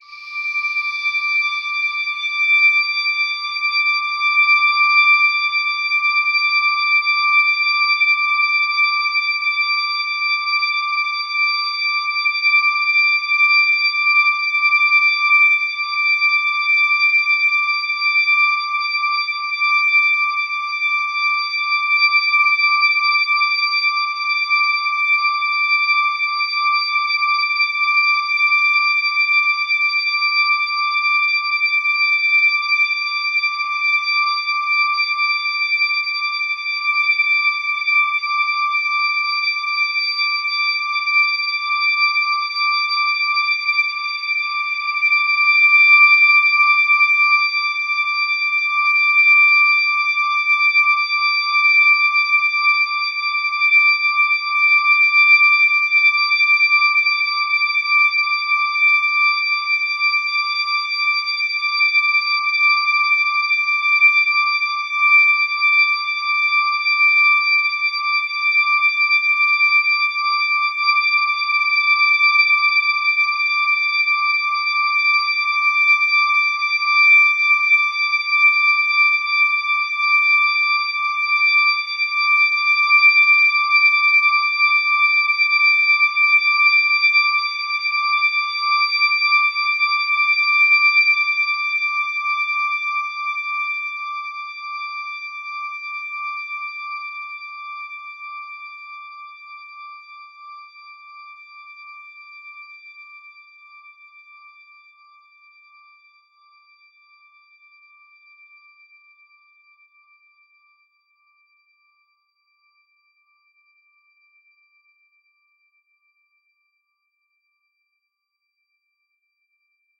LAYERS 014 - THE YETI-99
LAYERS 014 - THE YETI is an extensive multisample package containing 128 samples. The numbers are equivalent to chromatic key assignment covering a complete MIDI keyboard (128 keys). The sound of THE YETI is one of a beautiful PAD. Each sample is more than minute long and has a sweet overtone content. All samples have a very long sustain phase so no looping is necessary in your favourite samples. It was created layering various VST instruments: Ironhead-Bash, Sontarium, Vember Audio's Surge, Waldorf A1 plus some convolution (Voxengo's Pristine Space is my favourite).
pad, overtones